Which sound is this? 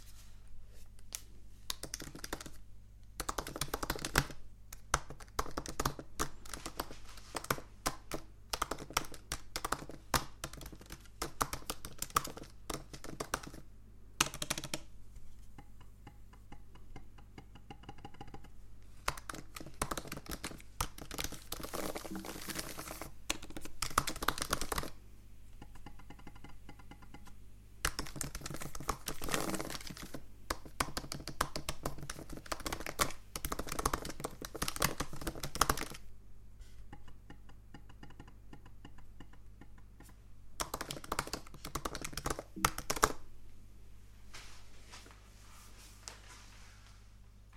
Macbook Keyboard
Macbook Pro Keyboard
computer, keys